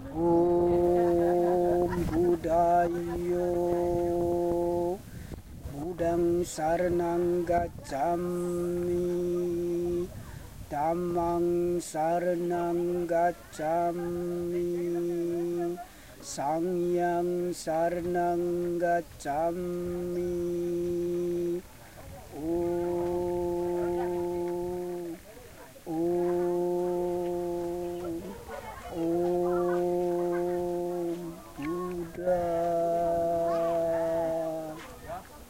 20070619 130507 buddha gebed borobudur
Prayer to Buddha on top of the Borobudur temple. Java, Indonesia.
- Recorded with iPod with iTalk internal mic.
borobodur, buddha, indonesia, prayer